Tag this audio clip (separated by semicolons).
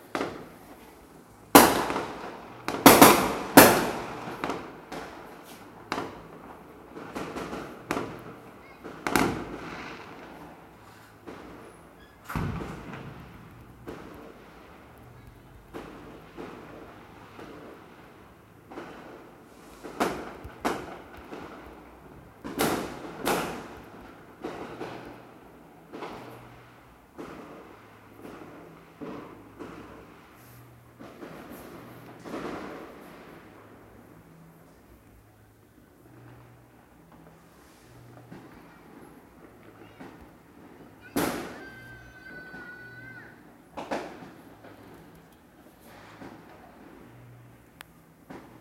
gunpowder; gun-shot; weapon